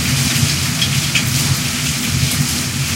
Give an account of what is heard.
Heavy Rain Loop #2
Doesn't rumble as much as the other sound effect (I guess). Recorded with my Samson C03U microphone.